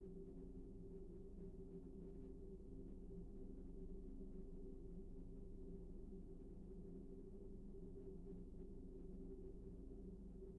Ilmakierto loop
ventilator, conditioner, wind, vent